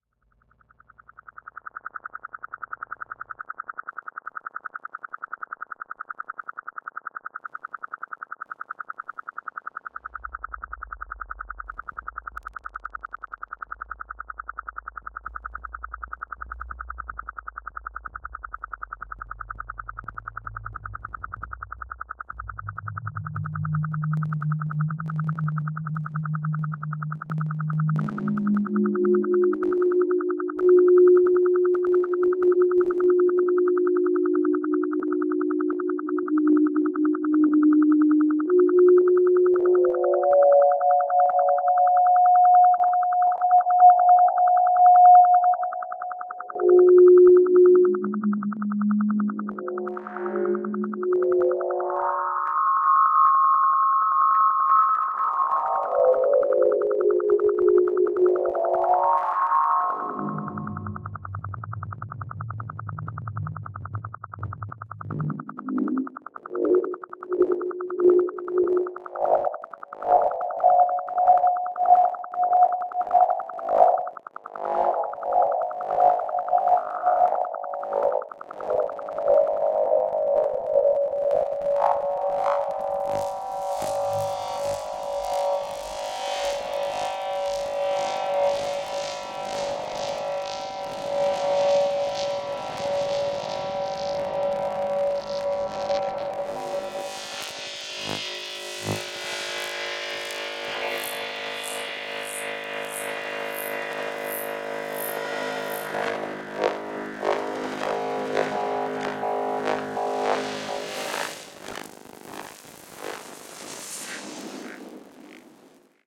Electric Snaps Synth Drone
ambient, click, resonant, telemetry, synth, snapping